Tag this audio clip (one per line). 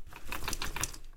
Backpack
Shake
Cloth
School